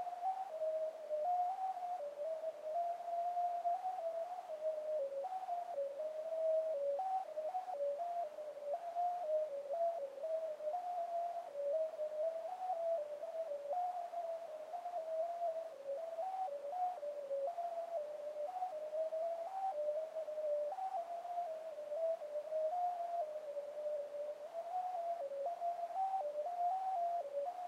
Some experiments with random pitches and adding more and more, creating (very) special atmospheres
ambience, ambient, atmosphere, electronic, horror, insects, processed, random